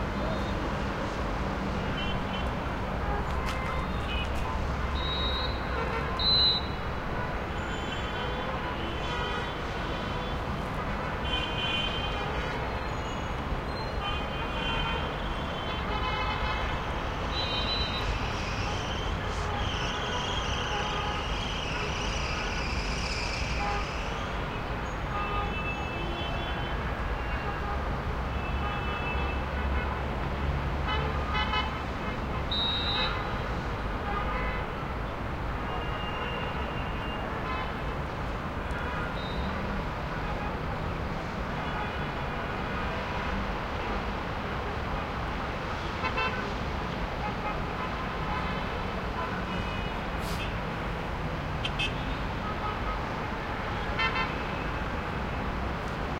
skyline Middle East distant traffic horn honks and city haze02 +sandy steps crowd presence, whistle cop, and distant car squeal middle Gaza 2016
horn, city, haze, Middle, traffic, distant, honks, East, skyline